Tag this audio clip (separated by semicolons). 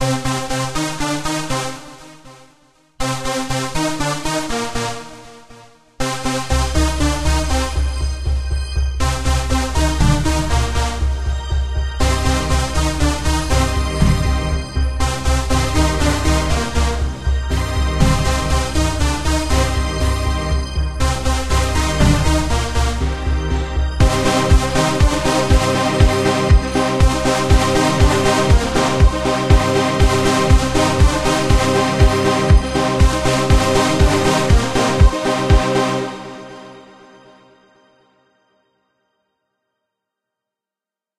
120; beat; bpm; dance; drum-loop; gain; improvised; key; loop; rhythm; rhythmic